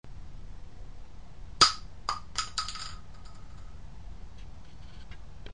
12 Gauge Shell drop
This is the sound of a empty 12 gauge shotgun shell being drop on a concrete floor.
shotgun, shotgun-shell, reload, 12-gauge, gun, remington, shell